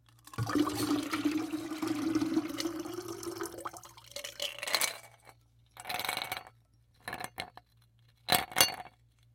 Pour into Container, Ice Clinks FF363
Pouring liquid into large container, liquid hitting bottom and sides of container, ice clinking at the end